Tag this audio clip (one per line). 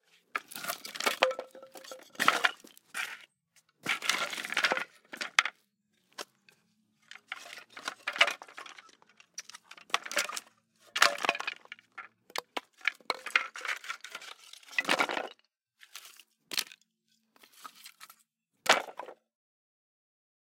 pile
stone
falling
hit
fall
impact
SFX
bunch
floor
scraping
wood
drop